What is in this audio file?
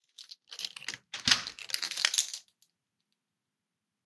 Unlocking a door with a key. Sound recorded by me.